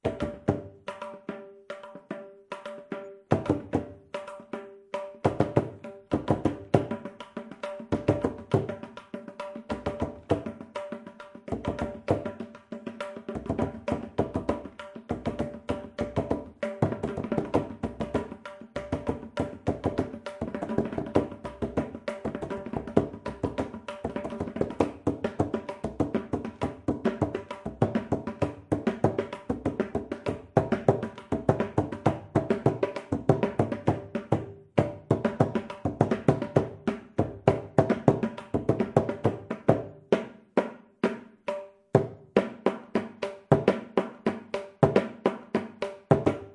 Mridangam-Tishra
A short theka, jathi and muktaayam in Tishra nade (Triple meter), Adi taala (8 beats in a cycle) on a Mridangam. (Amateur playing)